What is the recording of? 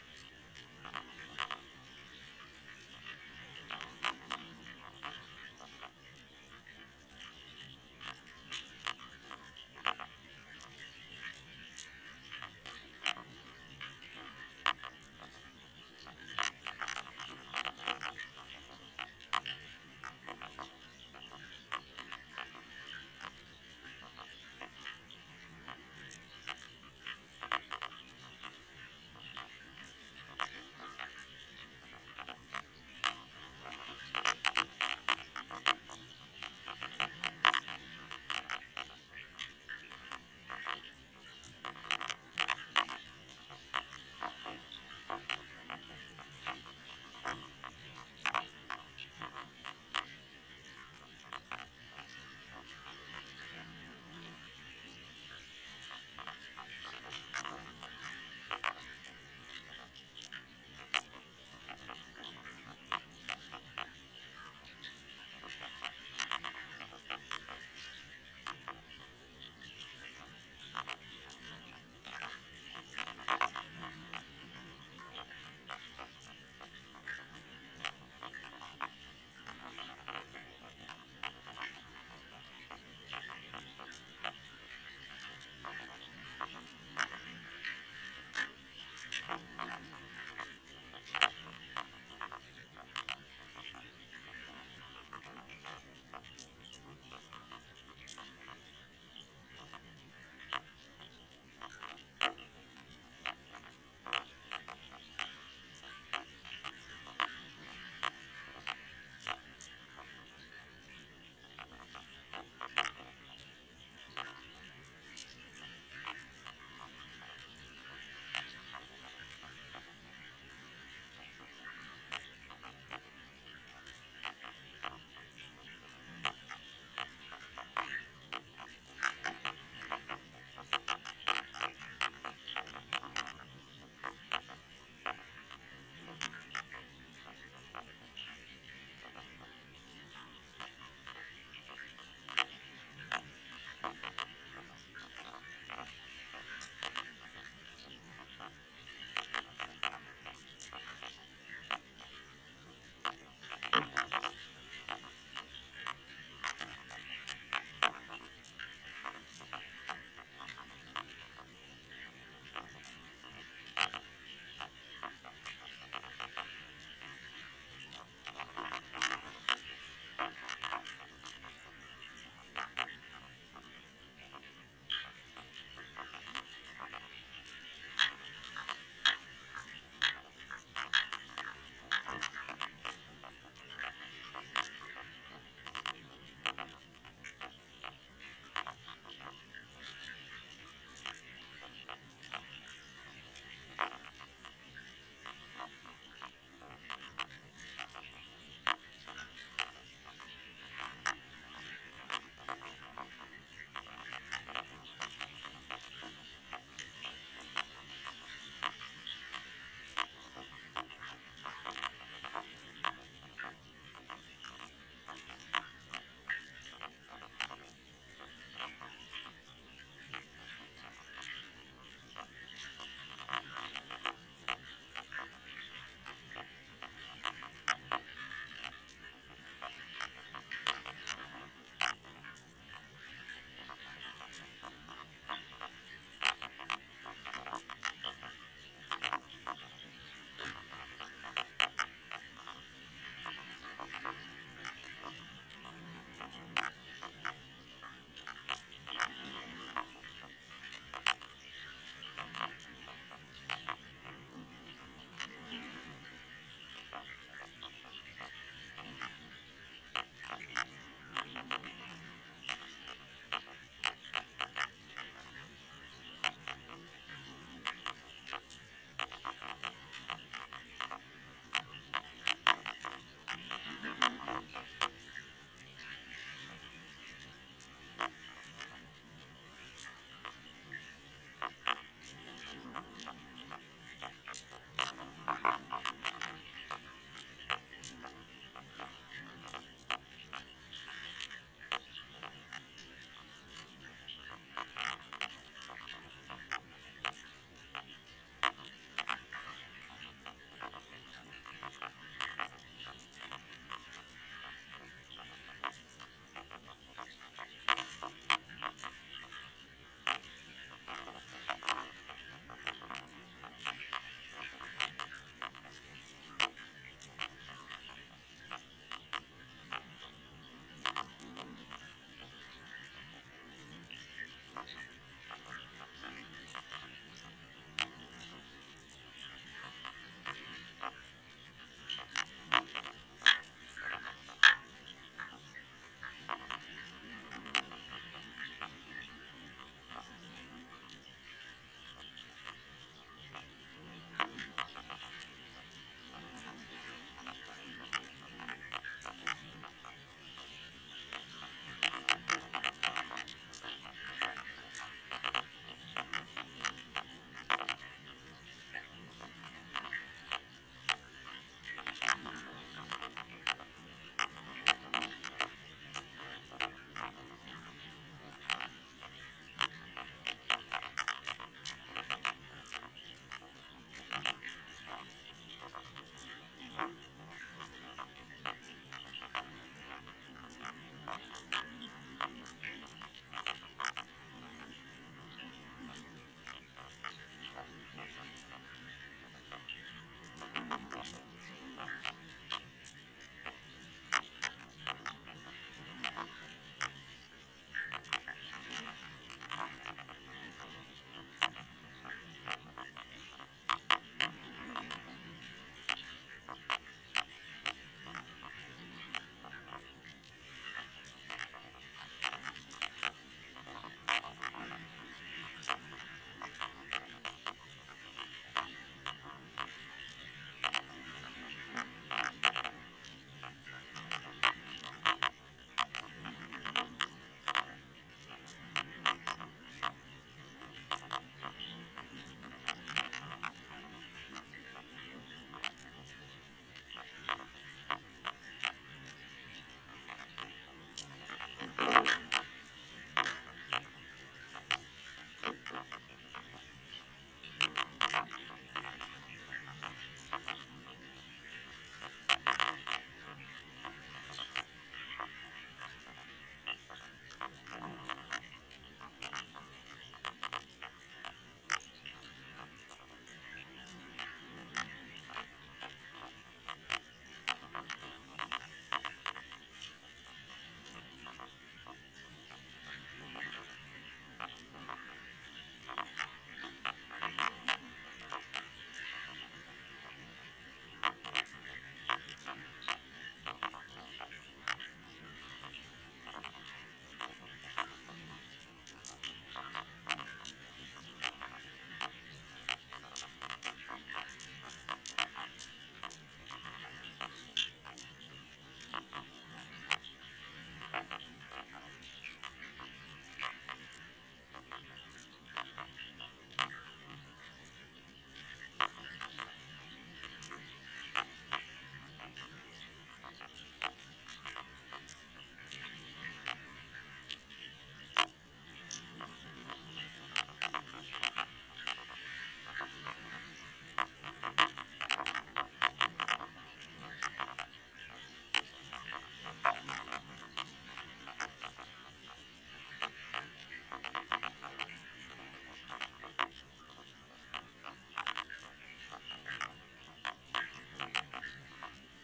Recorded on a home made hydrophone in Inish Oirr harbour. Most of the original recording is below the limit of normal hearing. The sound is translated up in frequency to the normal hearing range.